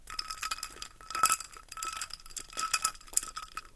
ice water
ice, juice, melt-water, melting, mix, stir, stiring, summer, water